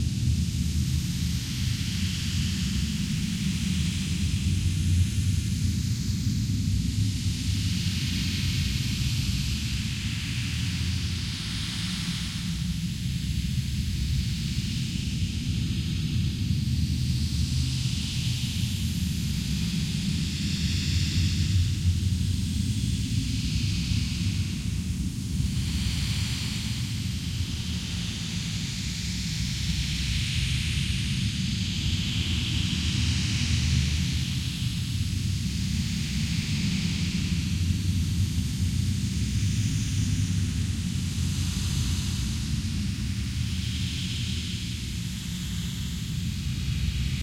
Fire Sound Design
Recording of a fire with some sound design